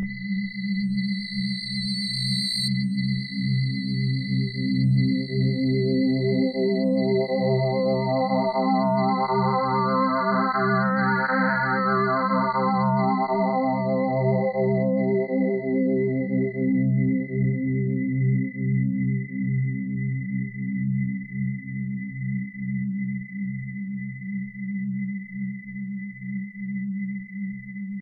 Recorded with Volca FM and Microbrute, processed with DOD G10 rackmount, Digitech RP80 and Ableton